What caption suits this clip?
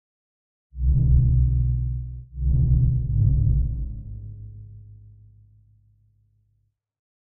A short orchestral sound repeated 3 times - deep with a strong bass. Part of my Atmospheres and Soundscapes pack which consists of sounds, often cinematic in feel, designed for use in music projects or as backgrounds intros and soundscapes for film and games.
ambience, atmosphere, backround, bass, cinematic, dark, music, orchestra, processed, strings